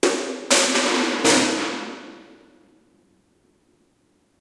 Exactly as described. Kicking a paint can.